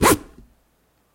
0014 MZipper Processed
Recordings of the Alexander Wang luxury handbag called the Rocco. Zipper processed
Alexander-Wang, Handbag, Leather